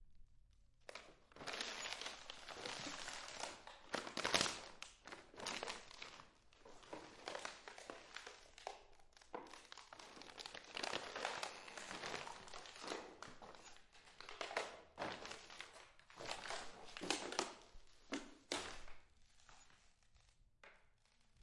Stepping on plastic
Recorded this with my MS Zoom H6.
Stepping and walking on plastic.